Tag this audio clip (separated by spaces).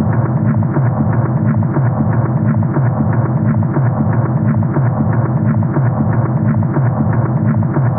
drum-loop tribal rhythm groovy drum loop drums